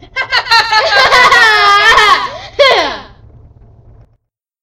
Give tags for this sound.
silly
sitcom
Laughing

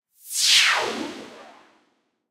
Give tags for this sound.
Fast
FX
High
Noise
Sound
Synth
Woosh

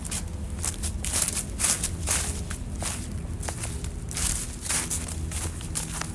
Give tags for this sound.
leaves
person
footsteps

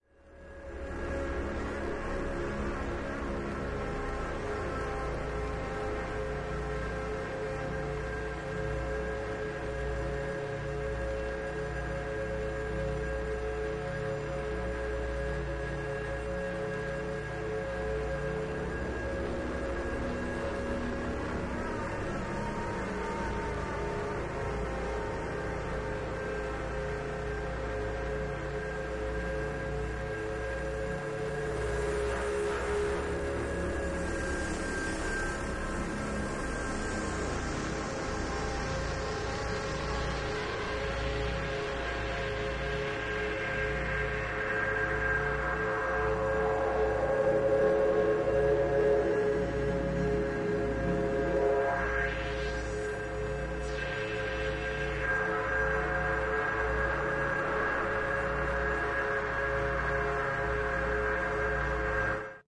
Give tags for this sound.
Alien
Cold
Distortion
Drone
Feedback
Guitar
Harsh
Ice
Mystery
Space

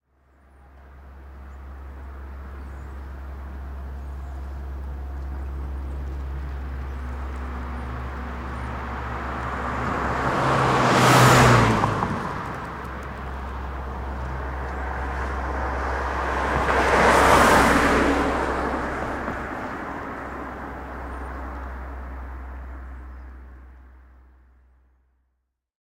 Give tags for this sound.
by
car

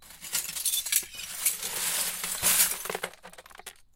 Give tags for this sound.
break
sweep
crunch
shatter
crack
shift
explode
glass

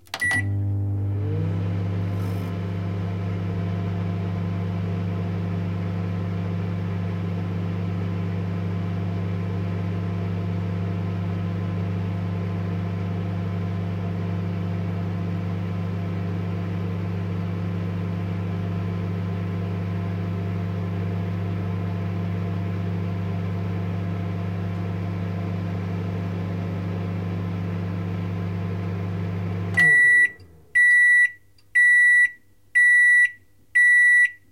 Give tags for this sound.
Household
Kitchen
Microwave
Cooking

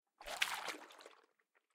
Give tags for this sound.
liquid
splash
water

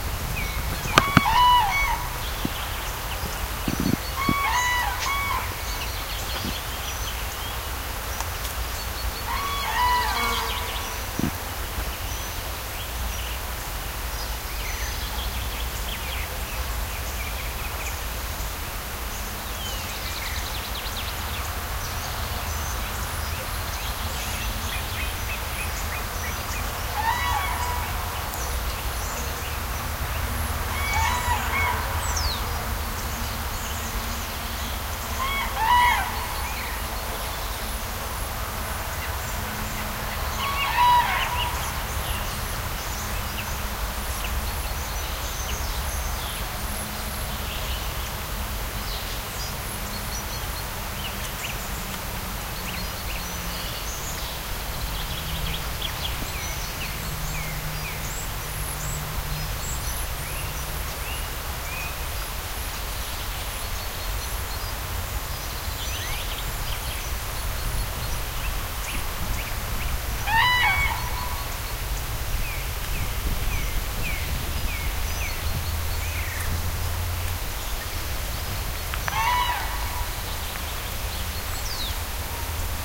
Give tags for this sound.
field-recording
spring
birds
park

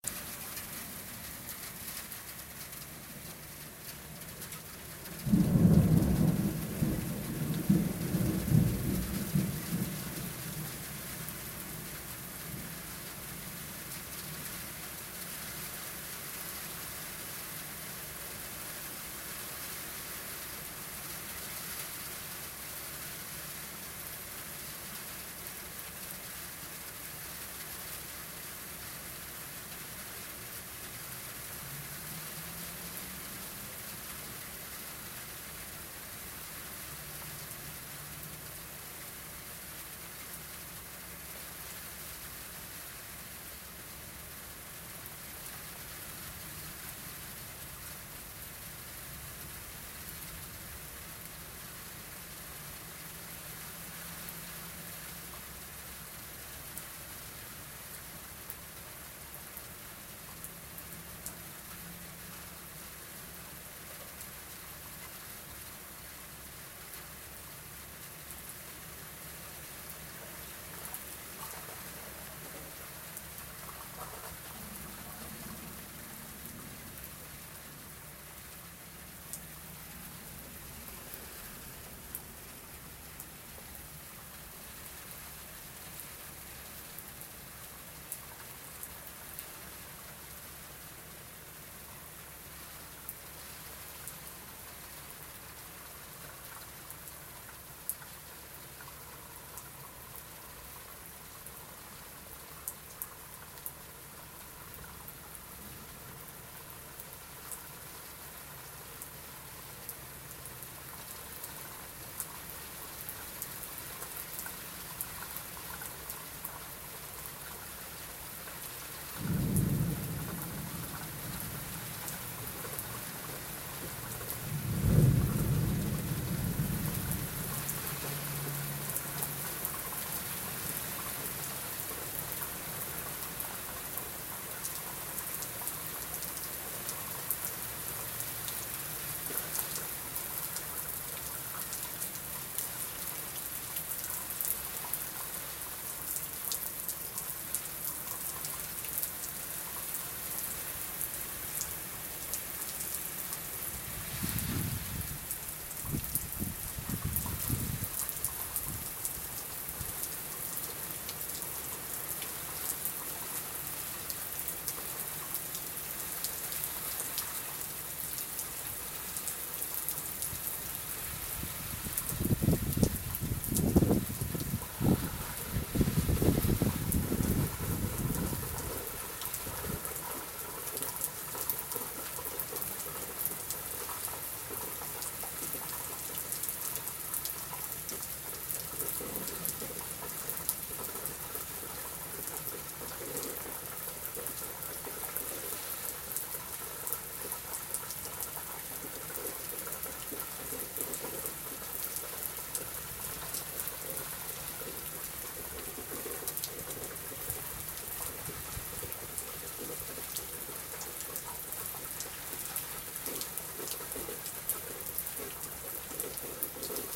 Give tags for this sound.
Weather
Thunderstorm
Storm
Lightning
Thunder
drips
Rain

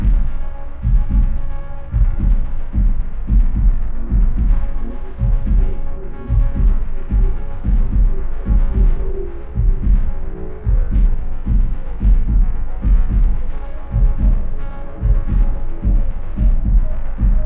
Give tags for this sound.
110bpm
fx
loop
synth

